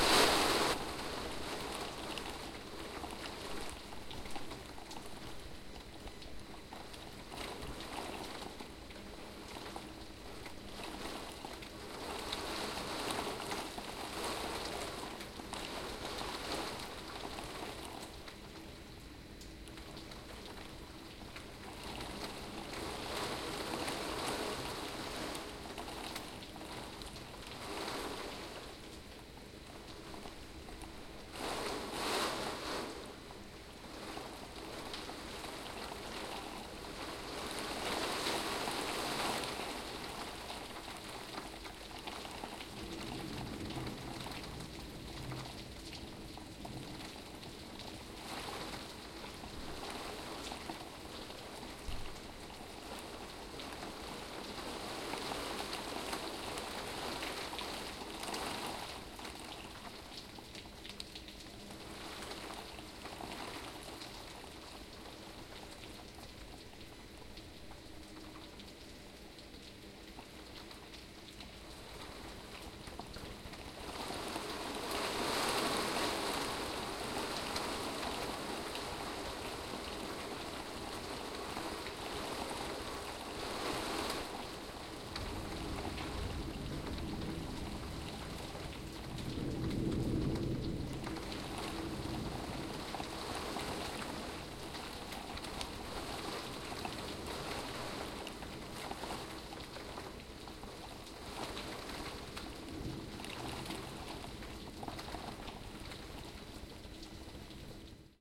Rain Interior ambience
Interior ambience during rainstorm with pelting rain on window. Some distant thunder rumbles.